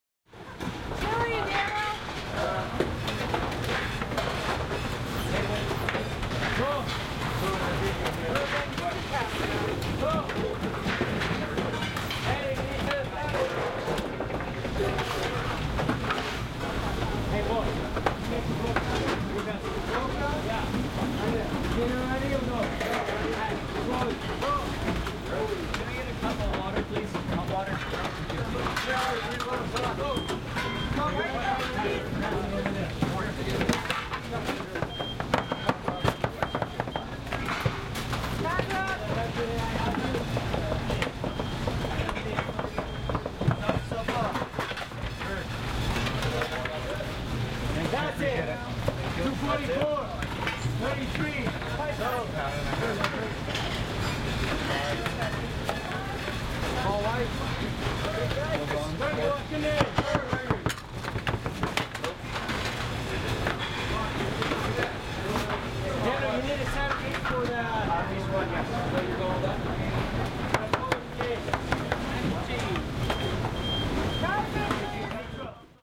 RESTAURANT KITCHEN IS BUSY
Recorded in a busy restaurant kitchen in the USA.
KITCHEN, USA, CHEF, RESTAURANT